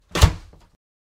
Wooden Door Close 2
Wooden Door Closing Slamming